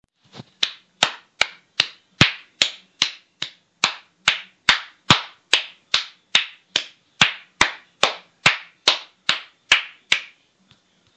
man claping slow.
tagstagstagstagstags, slow, clap